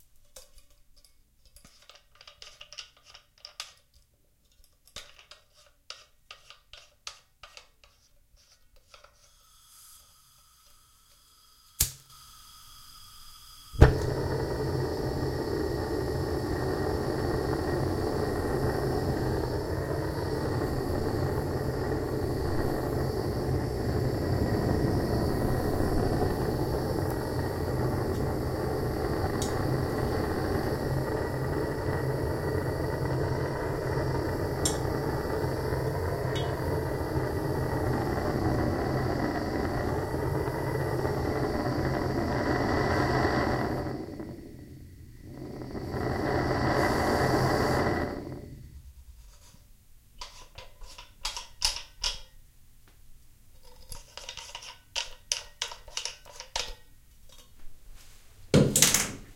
I sampled our benzomatic torch in the bathroom. Though I was only about six inches away from the mics, which are hypercardoid, so not much ambience is picked up. I move the torch around a bit then turn it up, then off. Also at the beginning of the sample is a flick of a lighter, which could easily be edited out for use on its own.